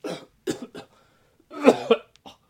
Human-Man-Coughing
A man coughing. This was another sound caught by accident, but turned out pretty good.